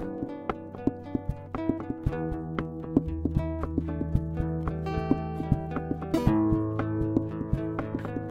Keep At It loop
Recorded using Digitech JamMan Solo looping pedal. Electric/acoustic guitar and keyboard both plugged in. Layed down base track and then just played around, layering different tones.
slower paced. guitars meander through, playing off each other and the beat.
percussion, acoustic, original, loop, chill, guitar